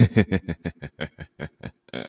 Normal laugh of evil intent.